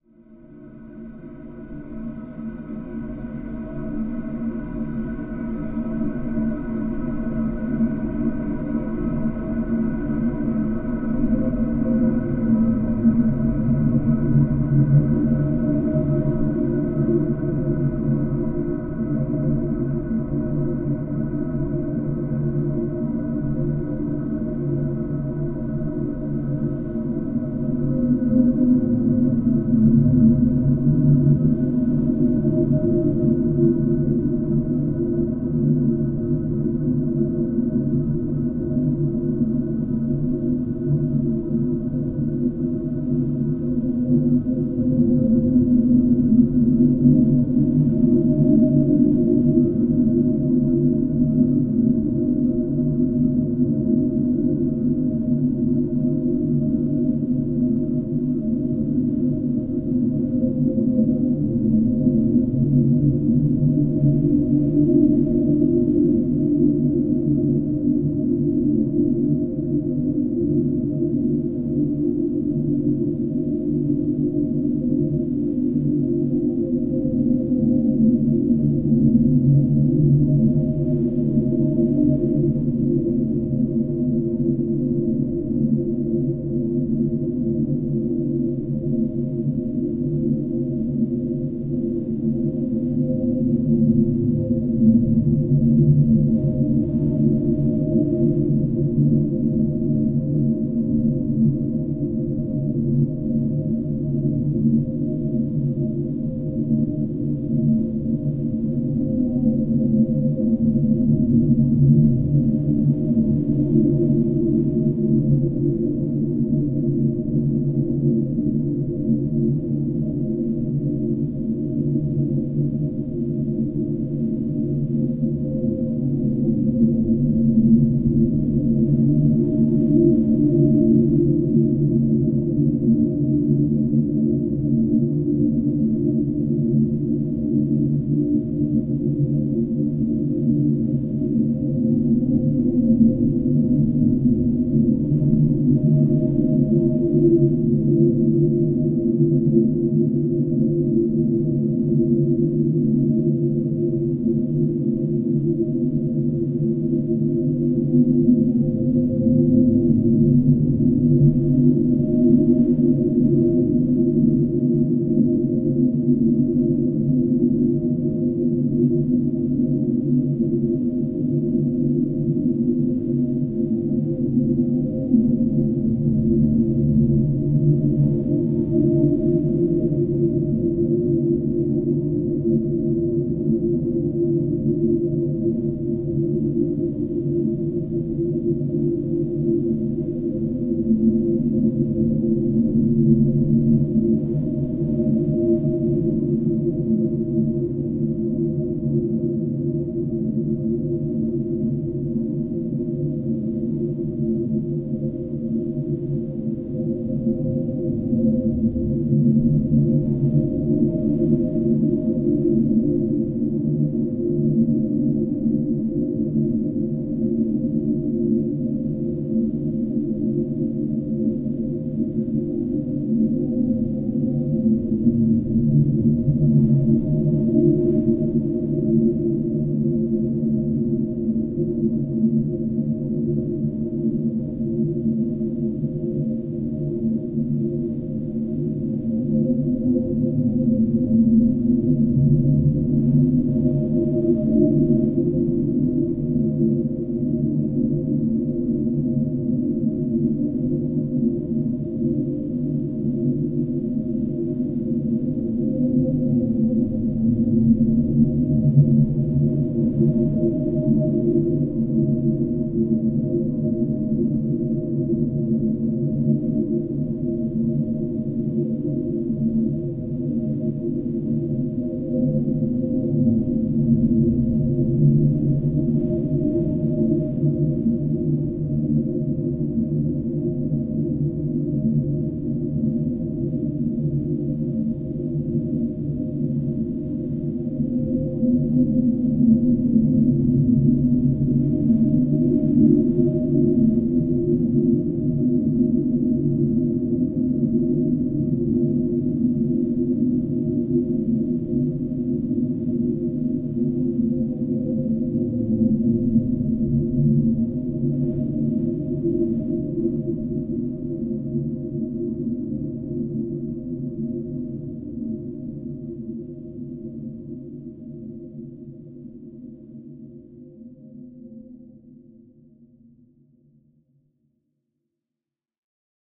Sound squeezed, stretched and granulated into abstract shapes
abstract, ambient, drone, granular, noise